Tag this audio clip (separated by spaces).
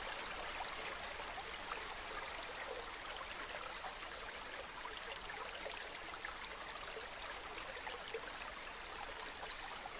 nature water ambient field-recording river seamless stream relaxing loop